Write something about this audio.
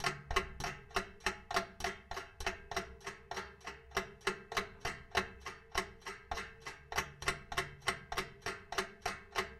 delphis CLOCK FX LOOP 2
one clock ticking recording placed into washing drum and one clock ticking recording into plastix box. mixed together and maximize in cubase4, enhanced with wavelab6 @ home
100bpm, c4, clock, delphis, fx, loop, s4, tick, ticking